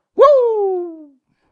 Whohoo vocal scream mario